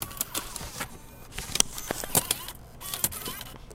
A friend recorded the sound of inserting and removing a CD via a mac. Turtle beach headset.
dvd-drive, insert, loading, unload, deject, motor, load, dvd, computer, eject, mac, remove, cd